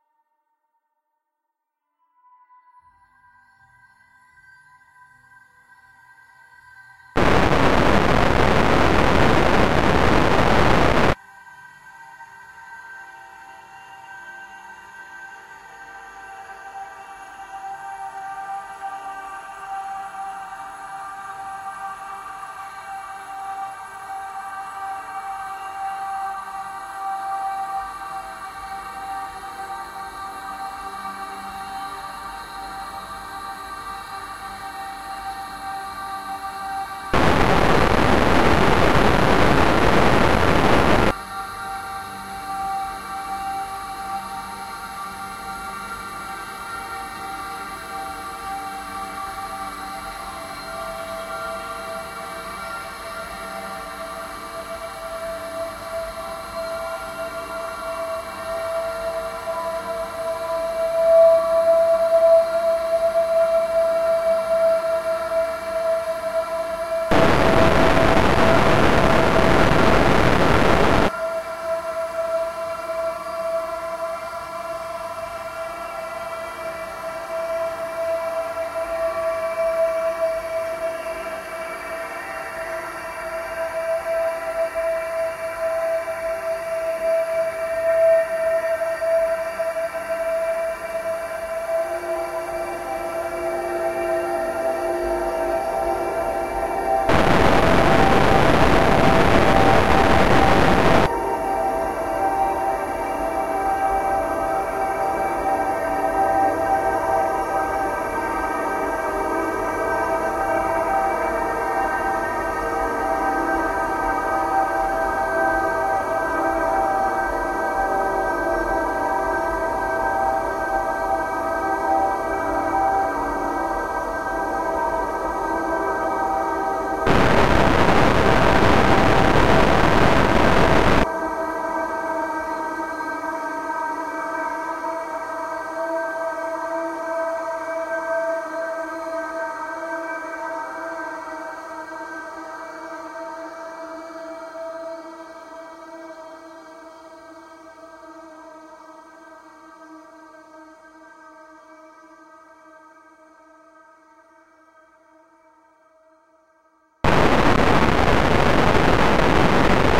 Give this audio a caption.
CARBON BASED LIFEFORMS-96
LAYERS 012 - Carbon Based Lifeforms is an extensive multisample package containing 128 samples. The numbers are equivalent to chromatic key assignment covering a complete MIDI keyboard (128 keys). The sound of Carbon Based Lifeforms is quite experimental: a long (over 2 minutes) slowly evolving dreamy ambient drone pad with a lot of subtle movement and overtones suitable for lovely background atmospheres that can be played as a PAD sound in your favourite sampler. The experimental touch comes from heavily reverberated distortion at random times. It was created using NI Kontakt 4 in combination with Carbon (a Reaktor synth) within Cubase 5 and a lot of convolution (Voxengo's Pristine Space is my favourite) as well as some reverb from u-he: Uhbik-A.
evolving, multisample